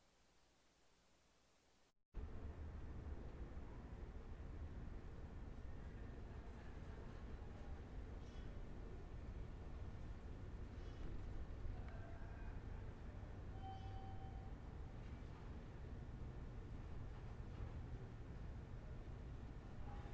Internal SoundPB123.Sub.01
Inside my grandads apartment capturing the sounds in the hallway.
Elevator, big